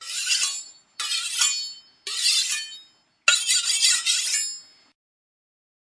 Sharping knife
ambient, atmophere, field, recording